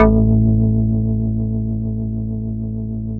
House Organ A1
A multisampled house organ created on a shruthi 1 4pm edition. Use for whatever you want! I can't put loop points in the files, so that's up to you unfortunatel
Vibes, House, Happy-Hardcore, Multisampled, Organ